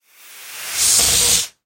Balloon Sample 01
Recording of a rubber balloon.
I wanted to see what I could capture just using my phone's internal microphone(s).
Simple editing in ocenaudio.
recording, experiment, balloon, smartphone, rubber, air, pressure, fun, Ballon, simple